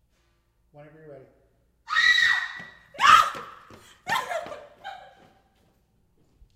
girl scream frank 5
girl, horror, scary, scream, screaming, screams, woman